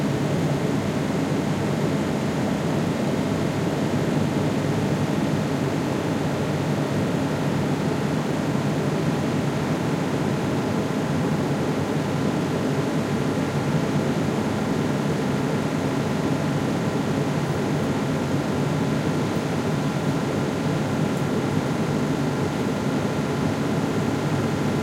Fan Ventilation Stereo 2
Ventillation ambience from Lillehammer Norway
general-noise, ambient, atmo, ambiance, white-noise, background-sound, atmosphere, ambience, soundscape, background, atmos